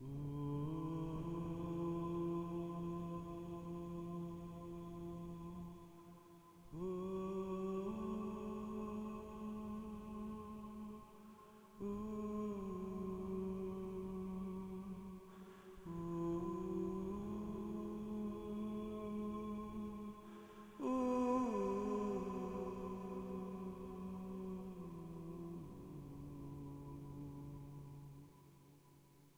improv vocal ooo's
me just making up some oooing then adding reverb and delay
ambient, singing